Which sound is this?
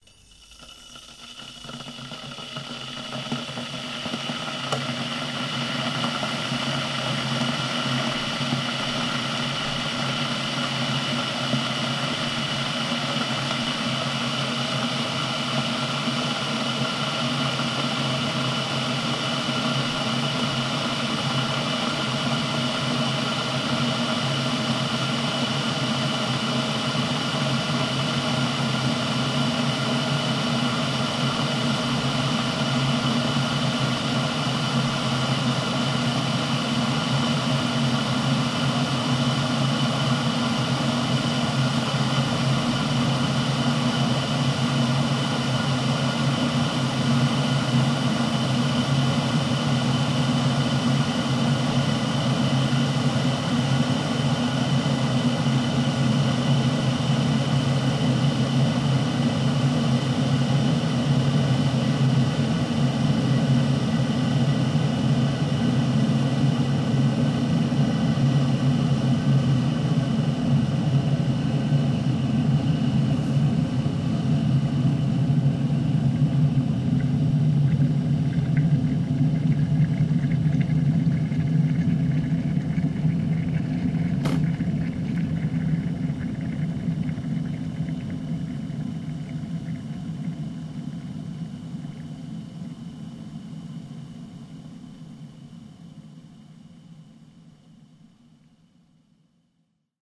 Kettle Boiling
The sound of a kettle coming to the boil and clicking off.